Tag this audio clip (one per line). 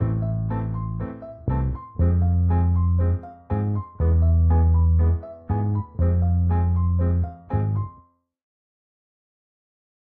60
60bpm
bass
bpm
dark
loop
loops
piano